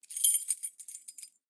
Keys Jingling 1 3
Sound, Jingle, Design, Jingling, Recording, Real, Door, Key, Keys, Lock, Rattle, Foley